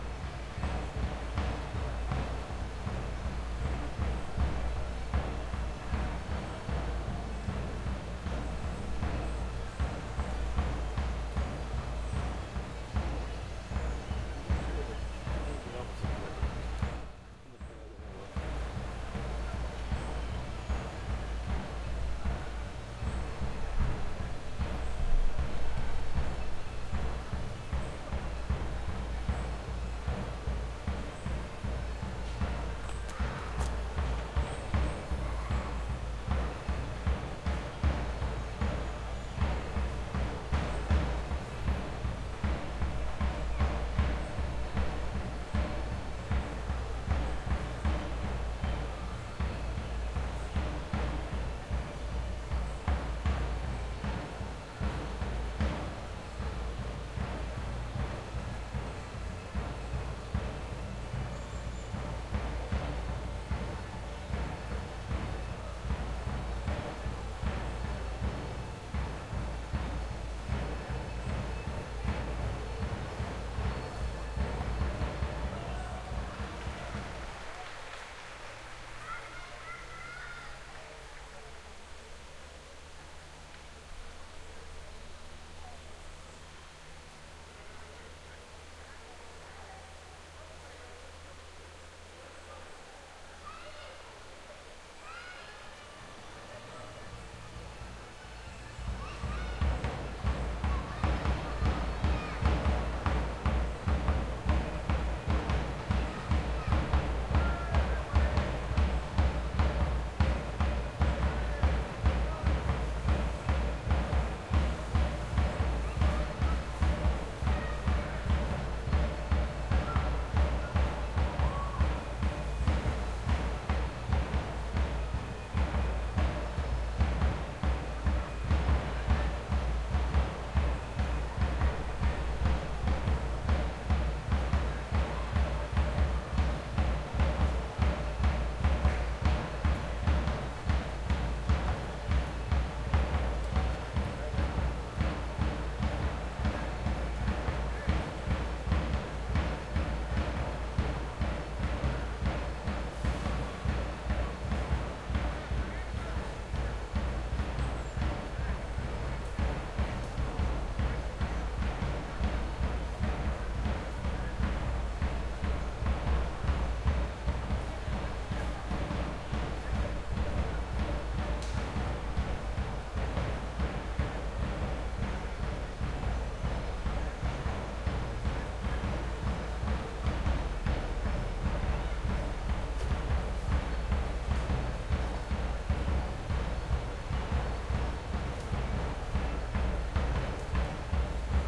stere-atmo-schoeps-m-s-forest-drums
distant drums in Forrest